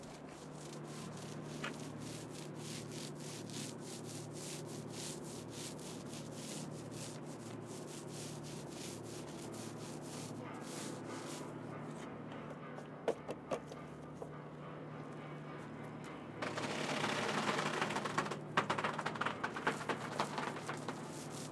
FX - brocha pintando barco y crujido de madera
brush, crackle, paint, ship, wood